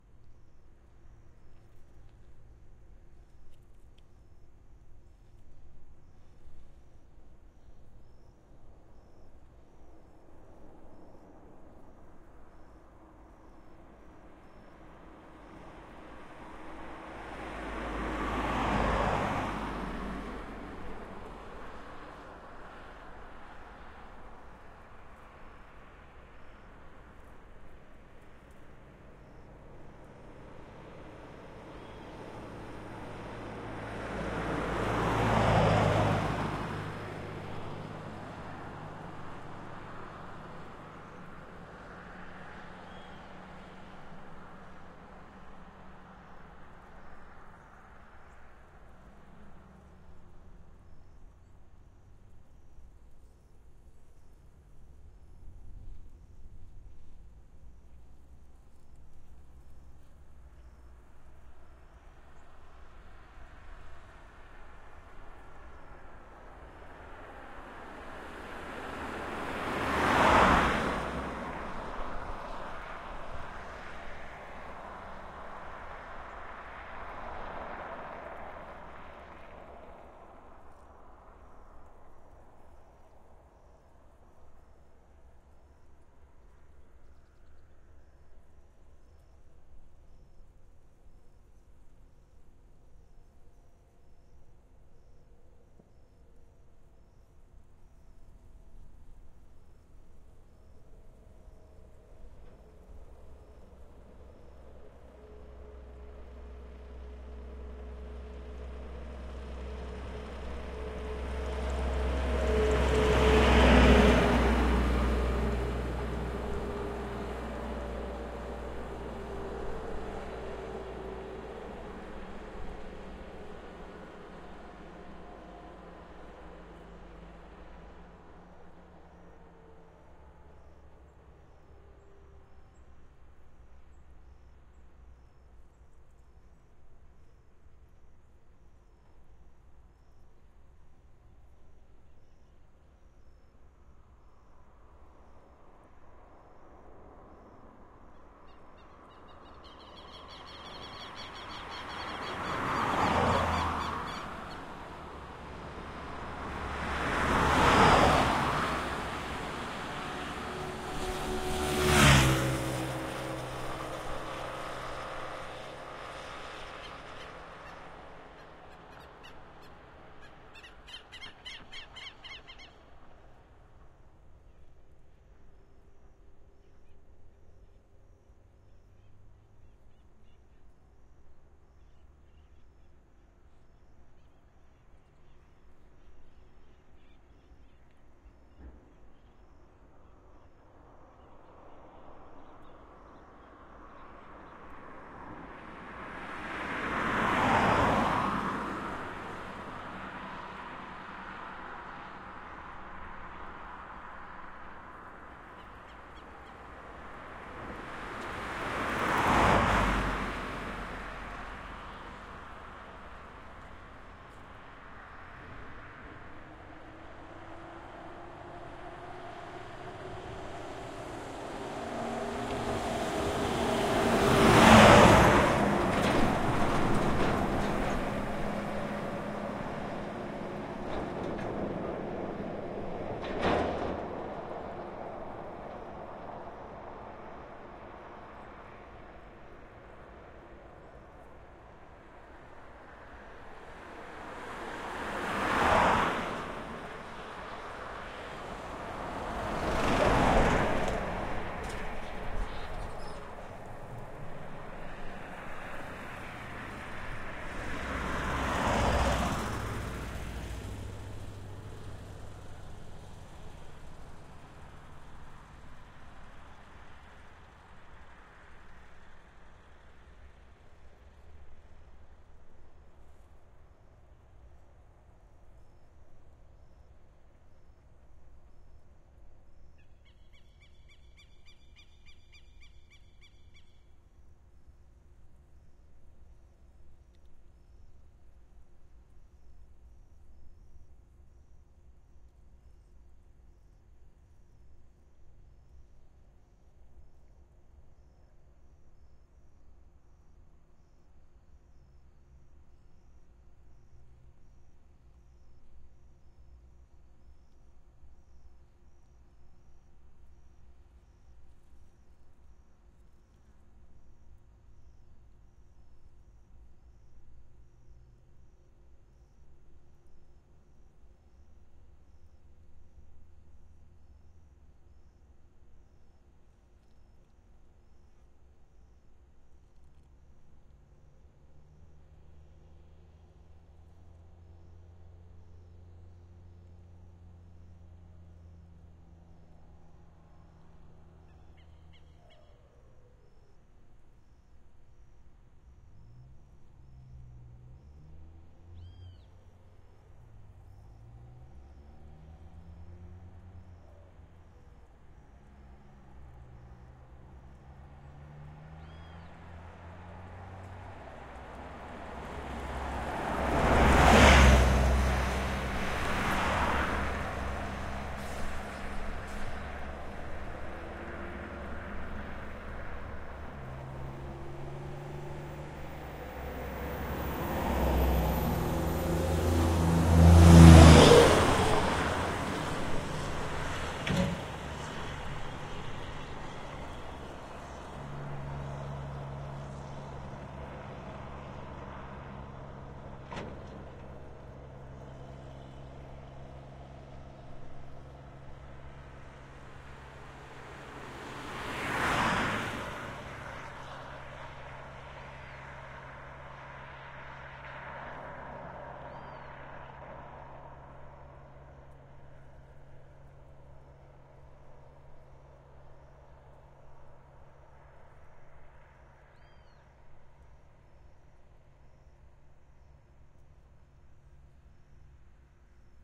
Heavy Trucks pass by - Fast Speed
Highway near Itaguaí Port, quiet ambience by roadside. Heavy cargo trucks pass by left to right and right to left. A few cars and small motorcycles pass by as well.
Fast speed.
Some birds on background.
• Audio Technica BP4025
• Sound Devices 788
cars, highway, pass, passing, road, traffic, trucks